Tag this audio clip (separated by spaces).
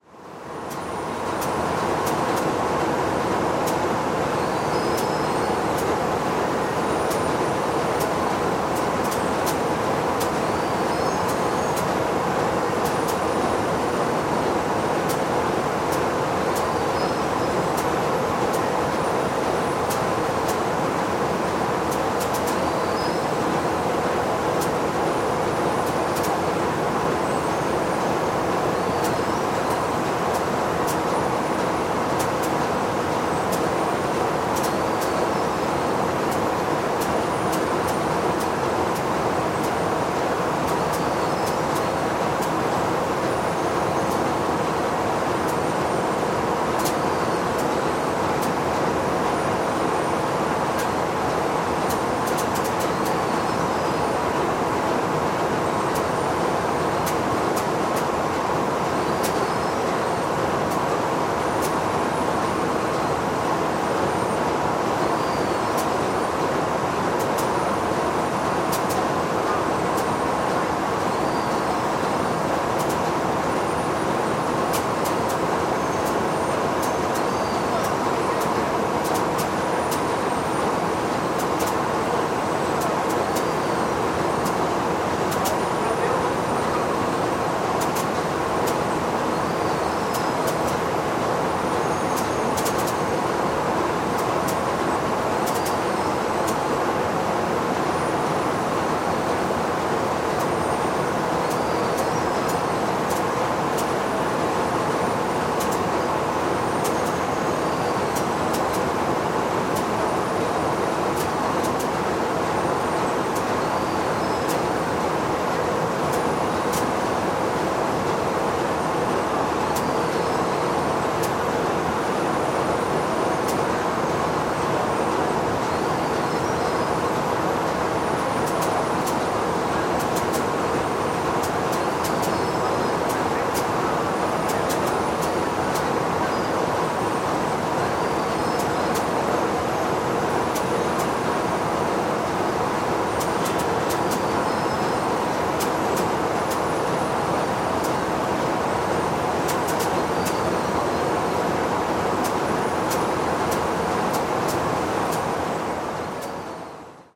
engine background motor cinematic atmosphere brazil BG field-recording FX Tascam-HD-P2 ambient factory machine Sennheiser-ME-66 machinery industrial